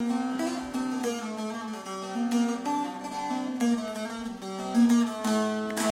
guitar turkey
Essen, Germany, January2013, SonicSnaps